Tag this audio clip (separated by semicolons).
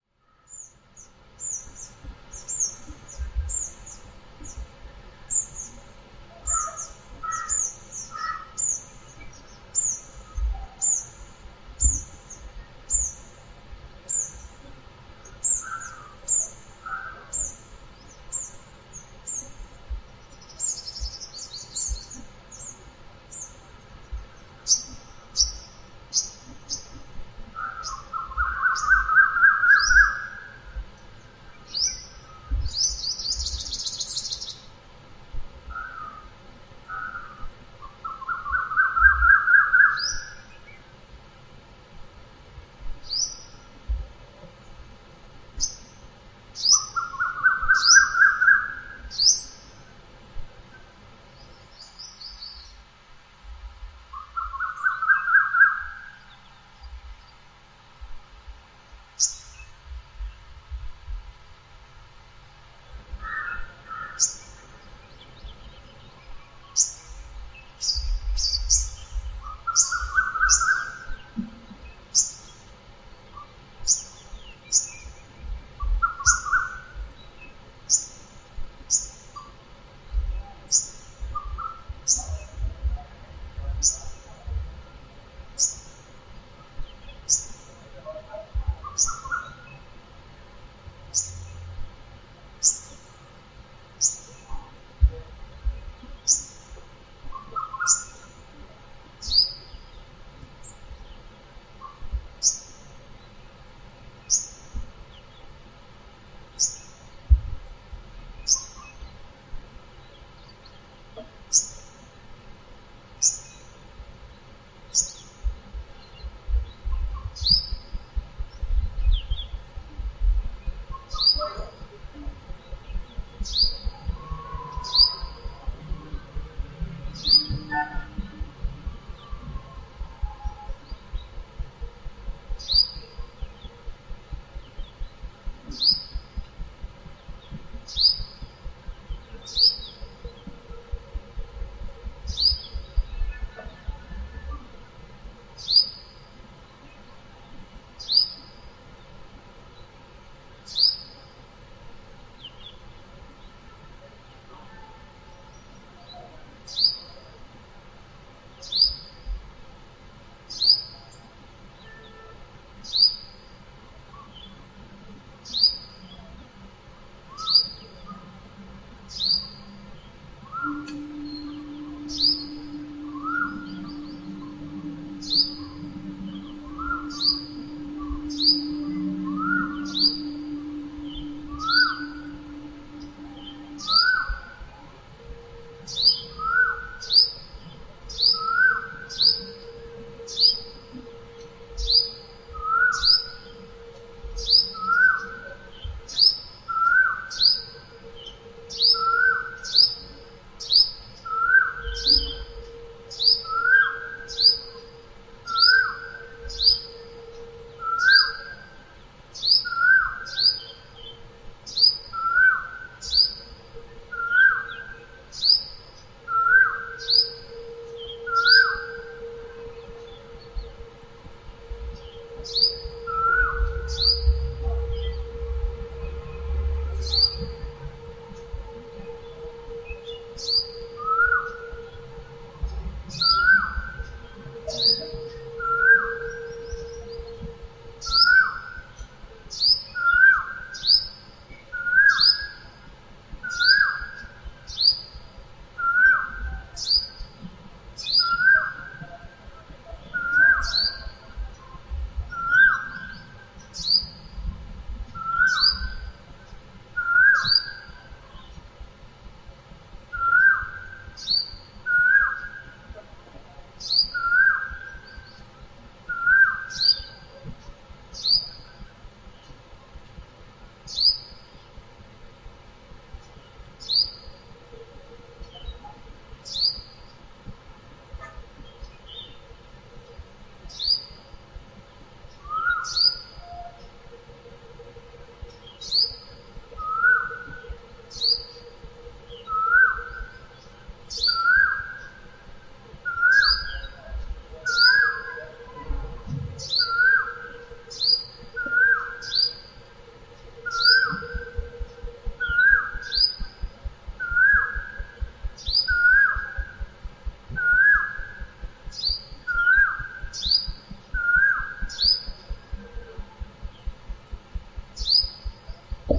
2021; Bengaluru; bengaluruconfinementsoundscape; bird; birdcalls; birds; cuckoo; evening; field-recording; india; Indian-bulbul; Karnataka; march; urbanscape